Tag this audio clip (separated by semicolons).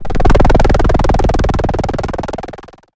chopper; copter; game; helicopter; propeller